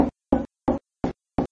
A sound of "followed thuds", recorded with a very simple microphone and edited to be cleaner.